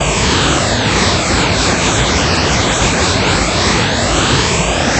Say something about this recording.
Random generated sounds.
Noise, Random, Sound-design